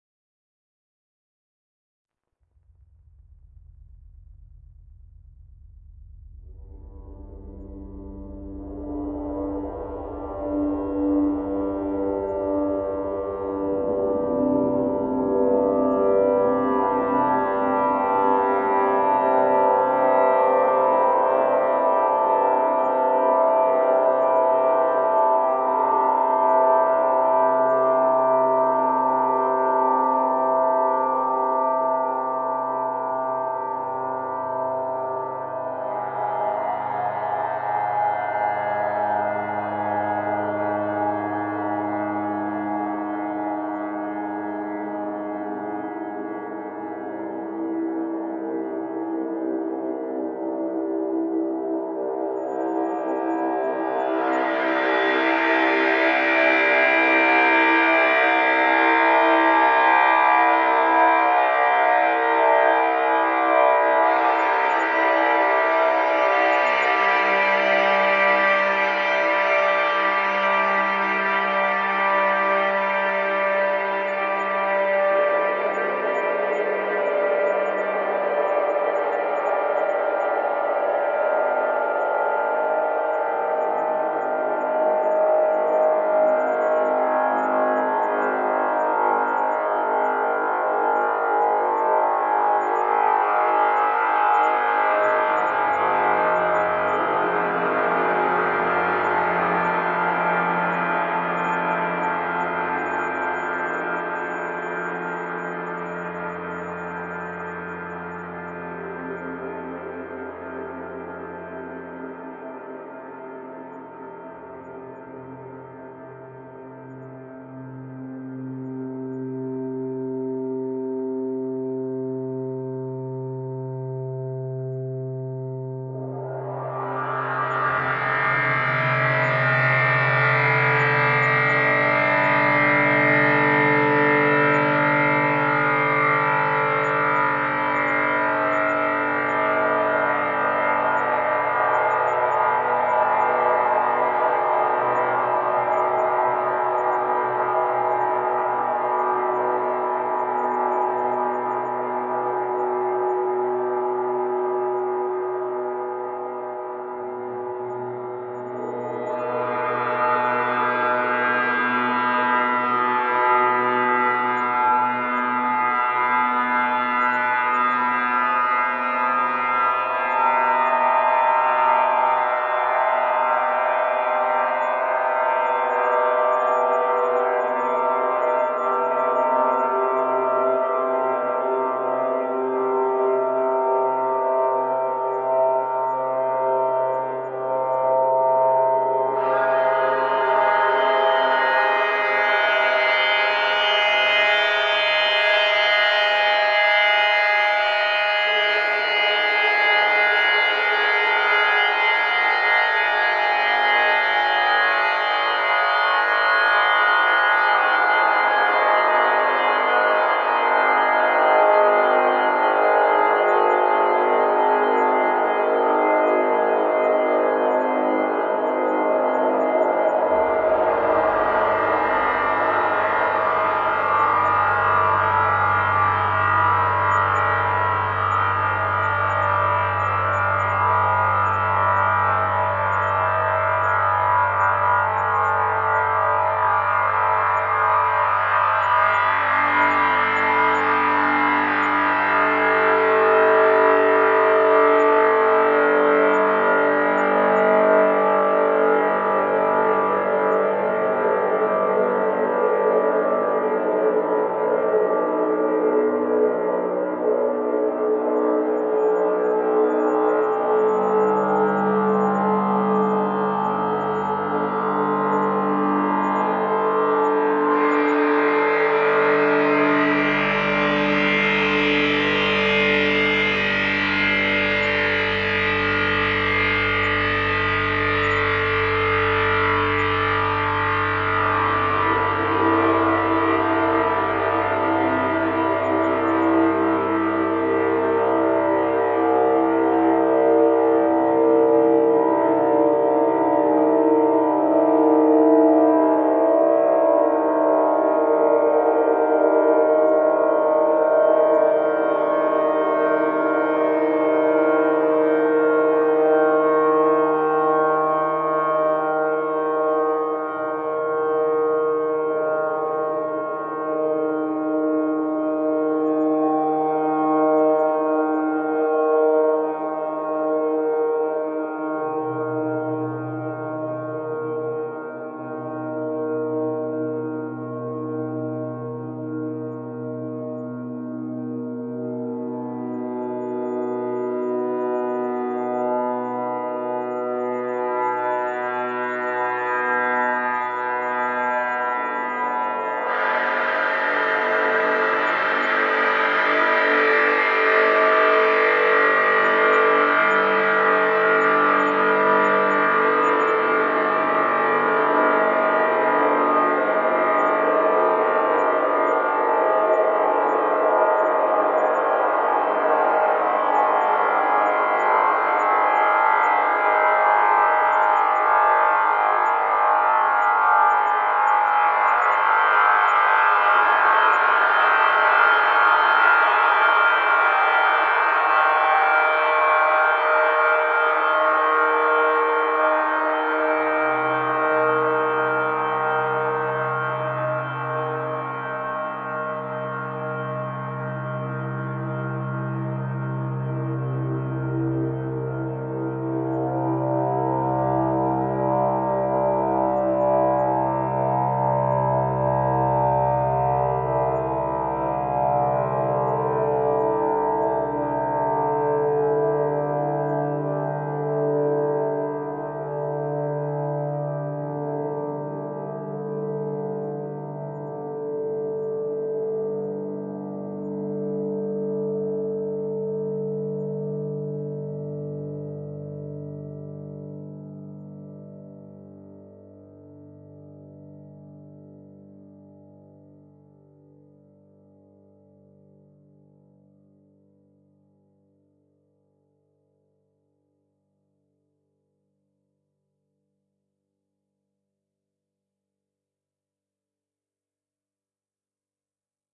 Output of an Analog Box 2 circuit I created called "didgeriswoop" because of the almost-didgeridoo-like filter-swept oscillators that are heavily reverberated with random variations in notes, noise modulation, filter Q and peak sweep frequency, etc. I took three runs at similar length (about seven and a half minutes), mixed them together, and ended up with this. It seems interesting to me that this sort of droning background can put you (or at least me) to sleep. I put this into my "musical" pack just because the notes could be musical enough to put into the background of some composition or other (it's not really music, itself). The analog box circuit actually has a percussion side-circuit, but I don't particularly like it, so I left if out on these runs. Believe me, you're glad I did.